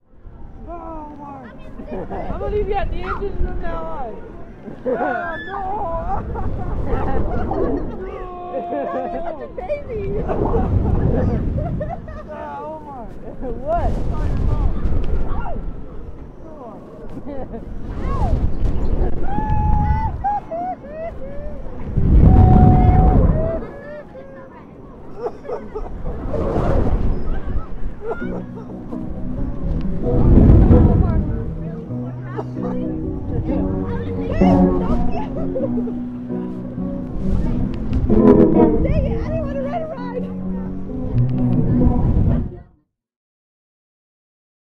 fireball excerpt
Recording of a coaster's final cycle. The fireball, recorded at the Okeechobee County Fair. Yup, My brother is definitely a ... You get the idea.
music, talk, winds, talking, velosity, speak, wind, speech, cough